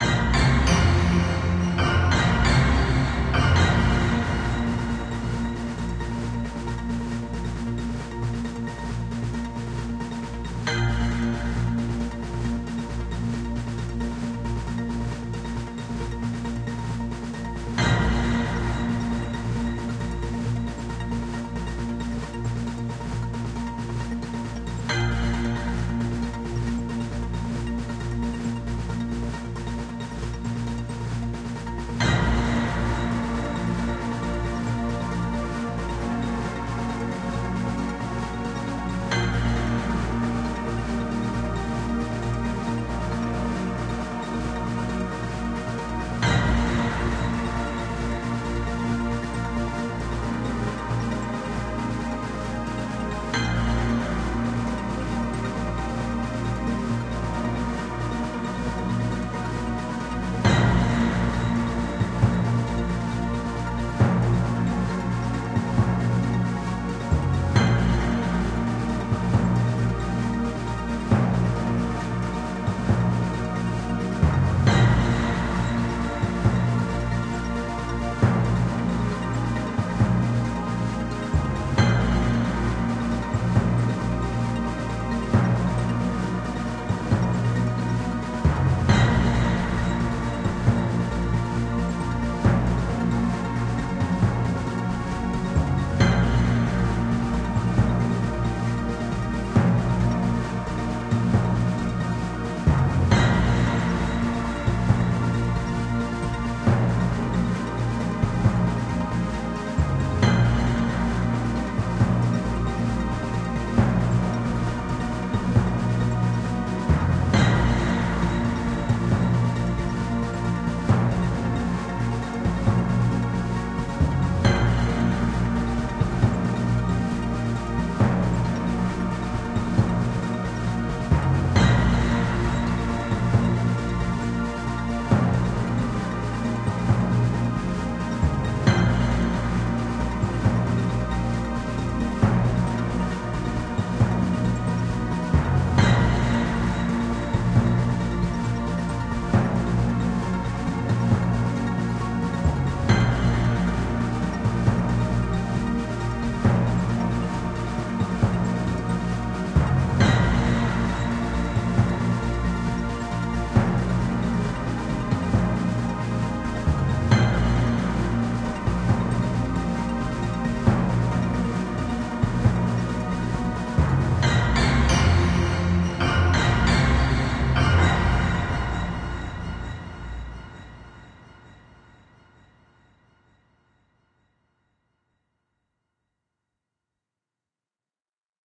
A jingle that could be used to indicate the start of a news program in any decent tv channel.